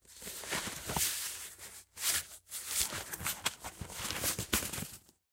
cardboard, paper, box, foley, moving, scooting, handling,